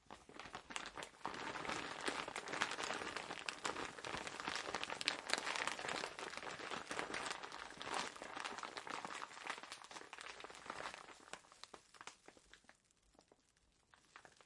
A series made from domestic ingredients (!) by pouring rice, beans, lentils and peppercorns into various containers and shaking them
rattle, shake, rhythm